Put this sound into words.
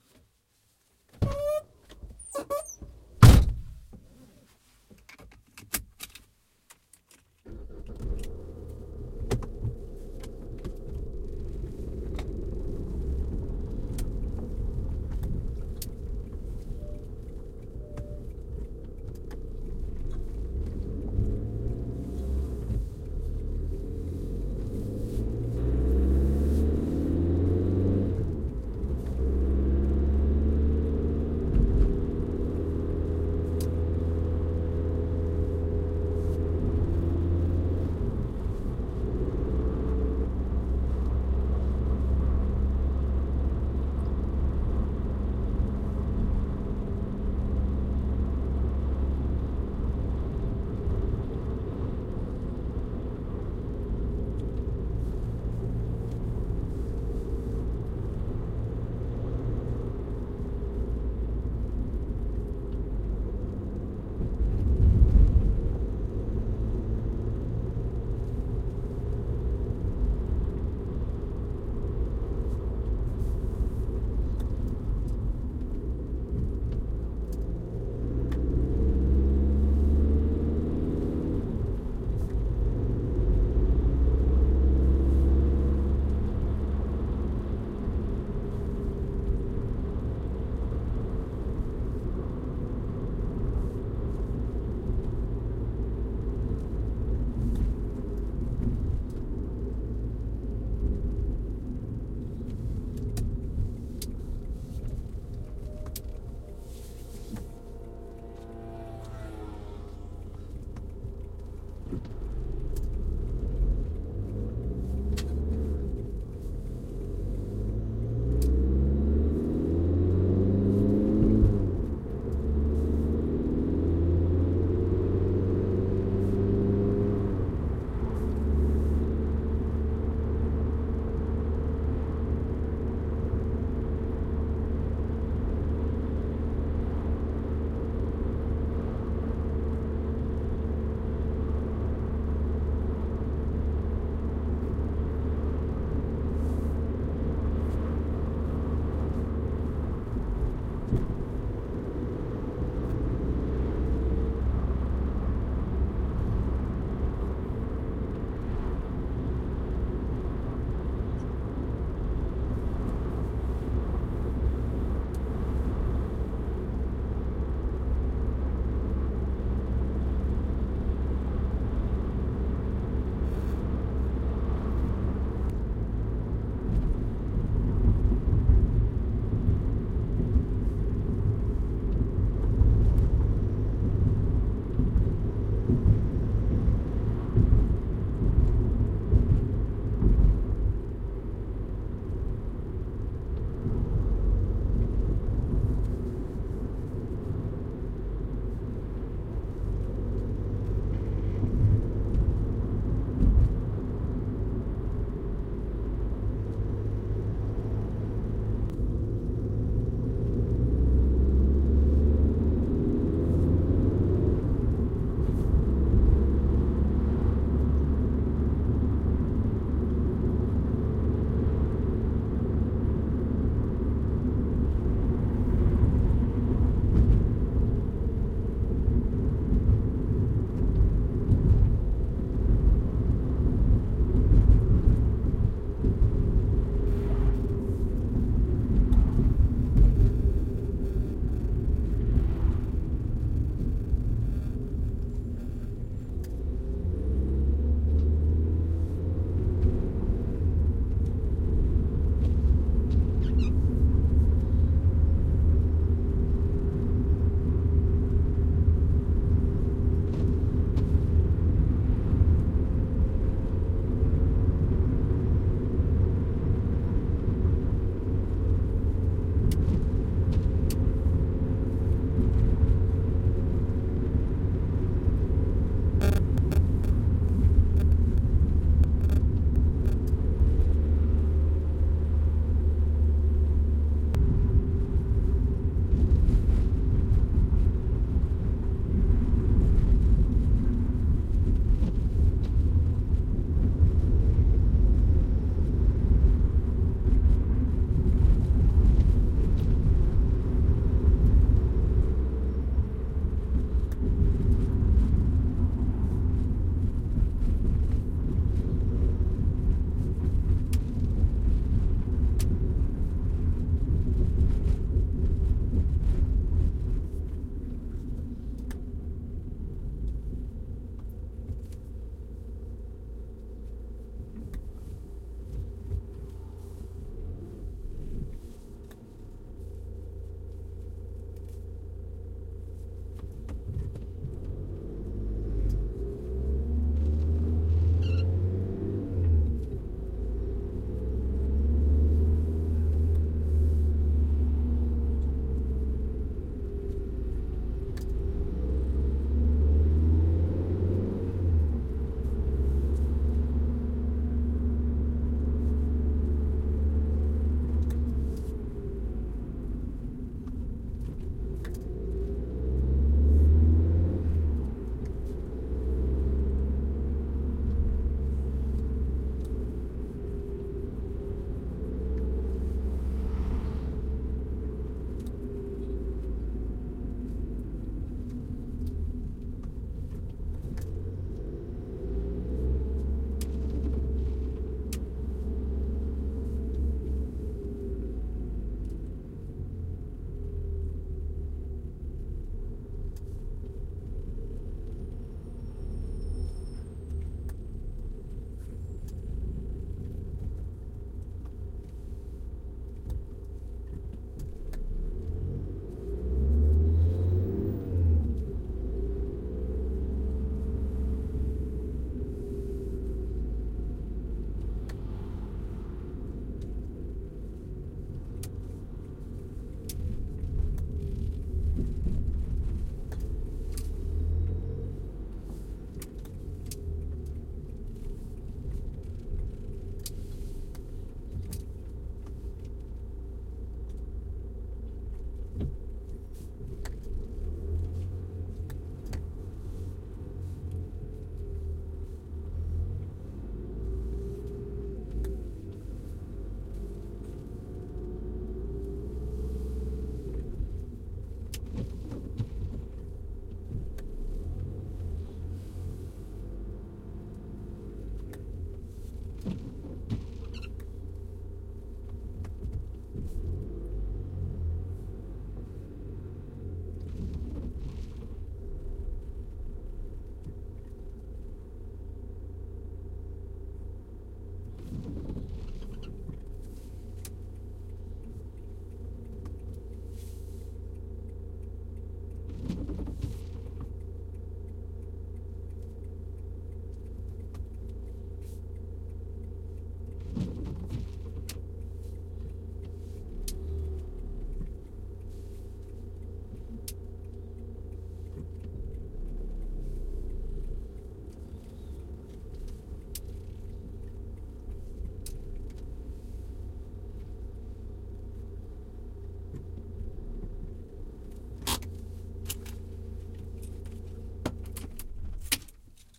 interior car start and depart
field recording, interior of a car, closing the door. Starting and driving on different roads in Belgium. Acceleration and halting. While driving the wind is blowing softly.